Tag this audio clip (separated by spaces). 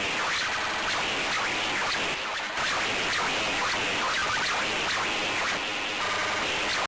breakcore freaky glitch glitchbreak techno